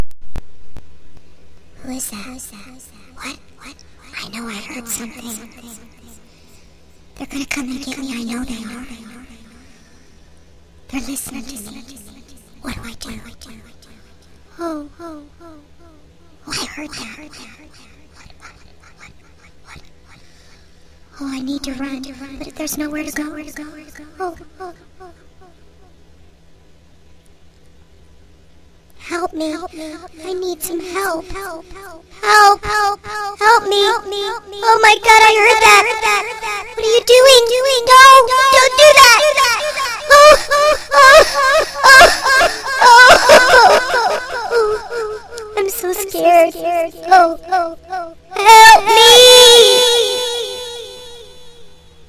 moan10 ECHO HIGH PITCH HELP ME
high pitch version of a cry of help me. This is with echo done on audiocity by Rose queen of scream